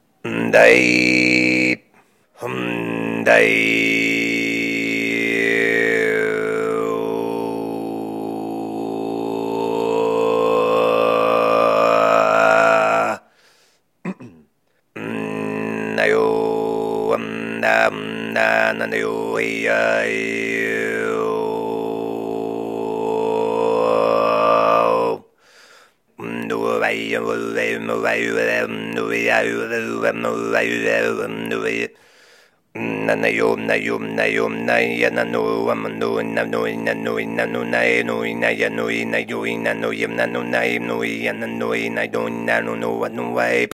Assortment of Tuvan-esque throat singing and loop opportunities - all done with my vocals, no processing.
singing, detroit